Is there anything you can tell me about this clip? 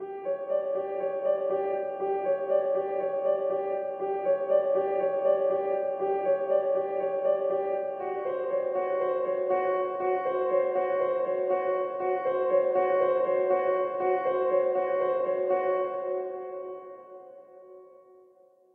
lonely piano6 60bpm
suspense, piano, interlude, atmosphere, spooky, cinematic, loop, ambience, horror, trailer, mood, radio, soundscape, chord, background, jingle, scary, pad, instrumental, movie, film, ambient, dark, instrument, background-sound, dramatic, music, drama